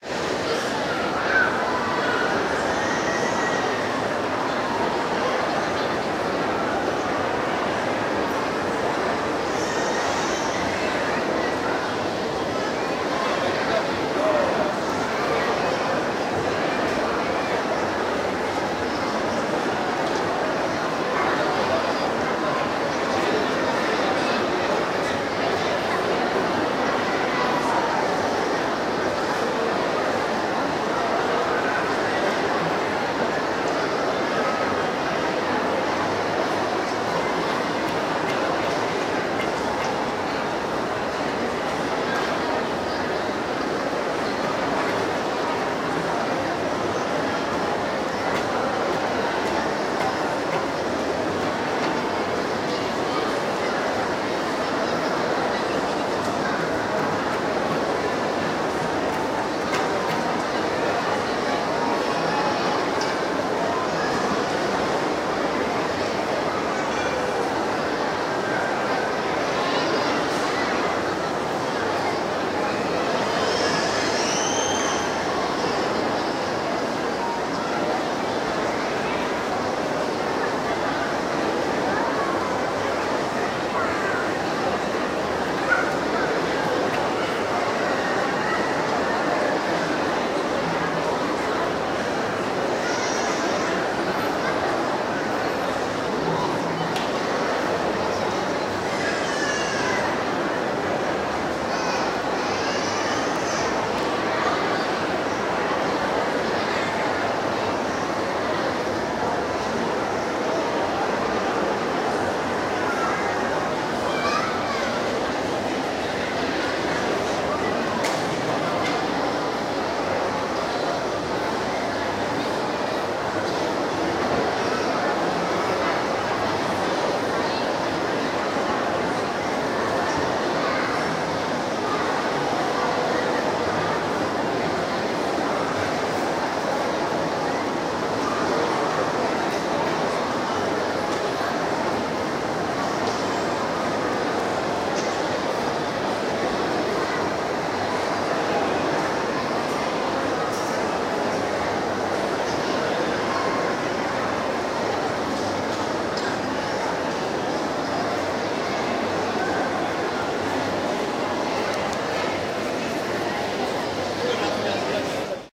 Shopping Mall Ambience
Shopping mall in Argentina.
talking, centre, hall, buying, talk, crowd, atmosphere, atmo, room, town, mall, chattering, city, activity, busy, tone, background, comercial, shopping, ambience, people